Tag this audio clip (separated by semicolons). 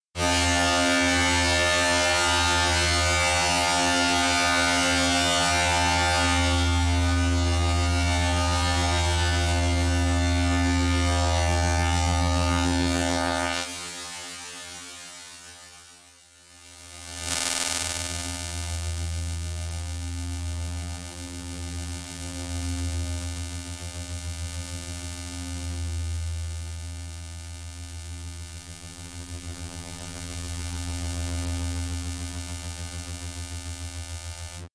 Dare-26; databending; experimental; image-to-sound; sound-experiment; unpleasant